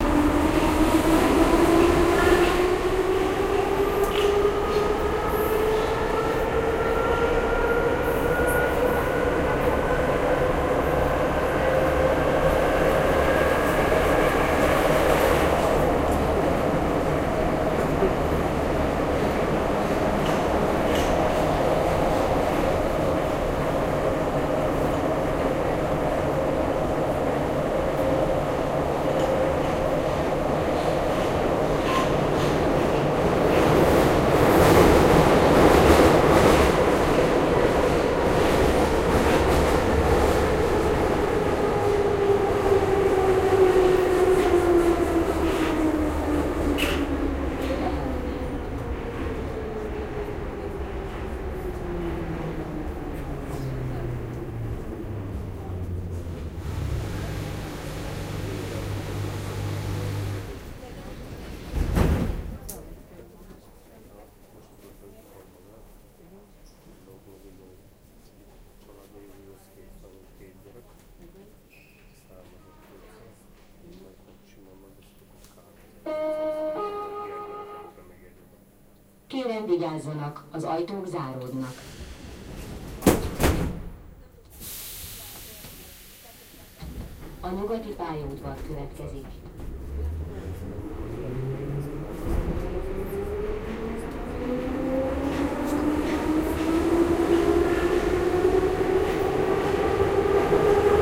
Sounds recorded whilst boarding the Budapest metro.